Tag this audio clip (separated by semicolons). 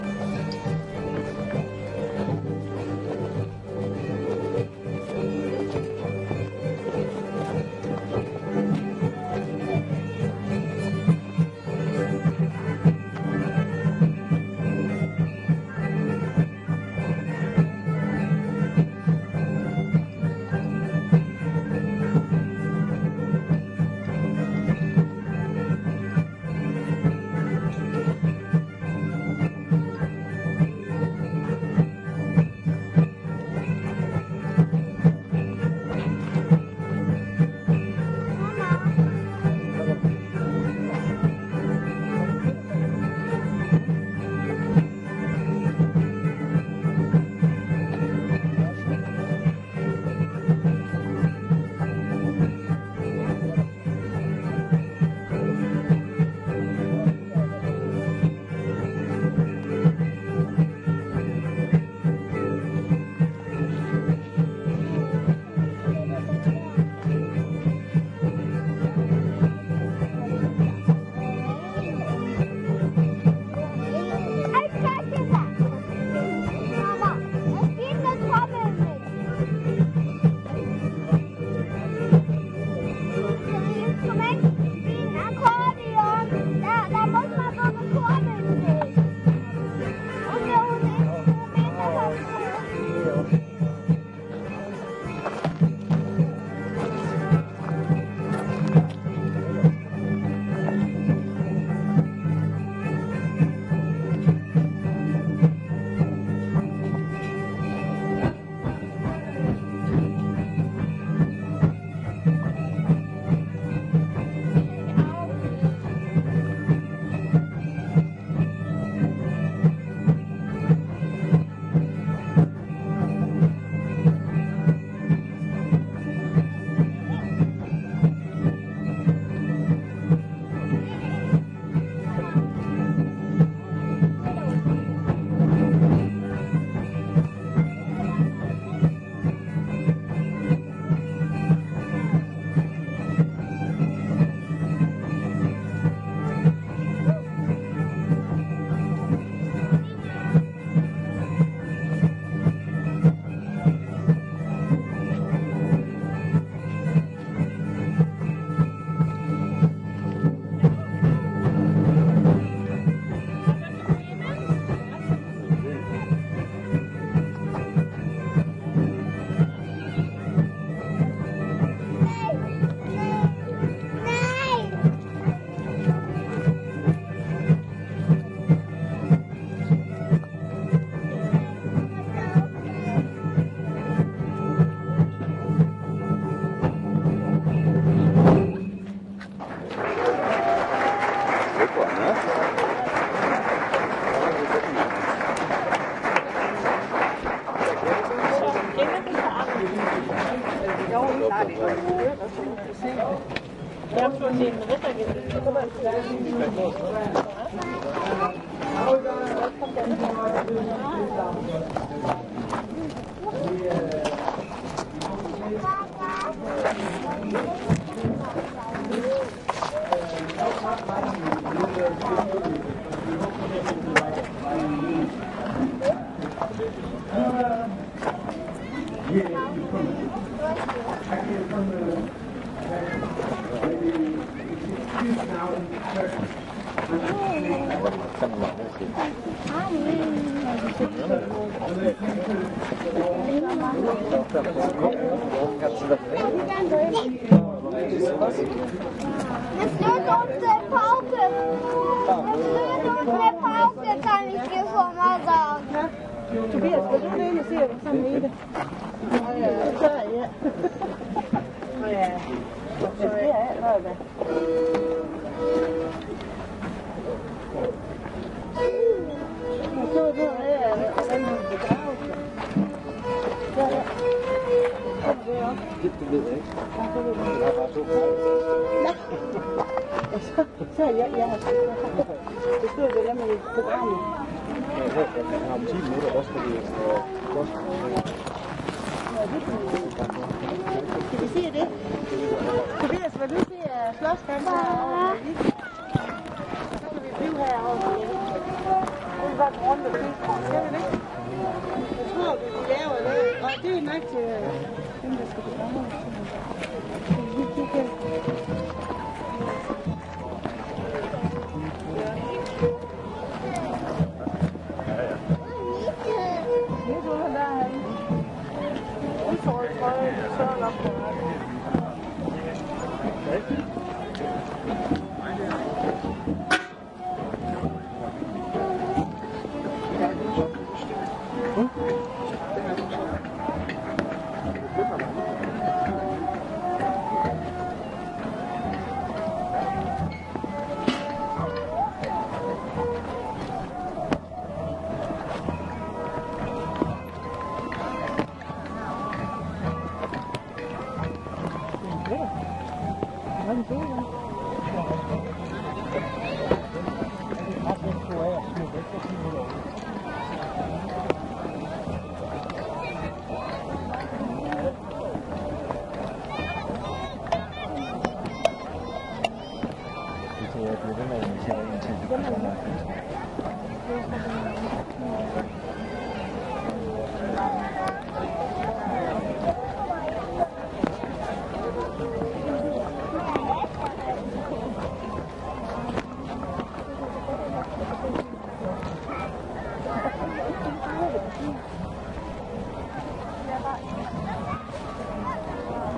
church; talk; music; fieldrecording; midieval; outdoor; musicians; viking; crowd; vikings; people